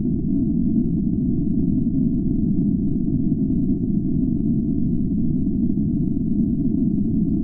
Underwater White Noise
That muffled sound you get when you're underwater
underwater, atmosphere, white-noise, ambiance, atmospheric, ambient, submerged, general-noise, soundscape